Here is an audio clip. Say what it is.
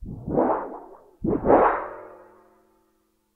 double gonghit
I am supposed to be fixing the grill with a piece of sheet metal but when I picked it up I heard the noise and could not resist. All I hear is more hiss. Must be the Samson USB microphone.
bong, ding, dong, gong, metal, noise